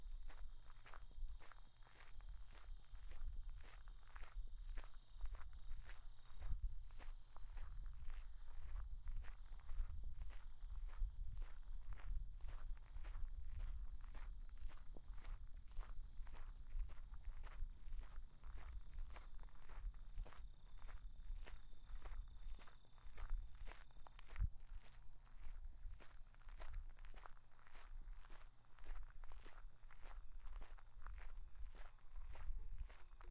Walking on gravel trail during a late summer night in Colorado
foot, footsteps, steps, walk, walking